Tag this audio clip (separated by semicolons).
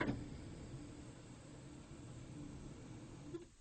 268791; electronic; machine; machinery; servo